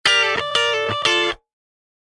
Funky Electric Guitar Sample 4 - 90 BPM
Recorded with Gibson Les Paul using P90 pickups into Ableton with minor processing.
electric, funk, guitar, rock, sample